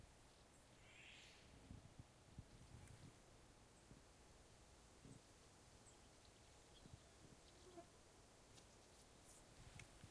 Quiet forest ambience, some distant birds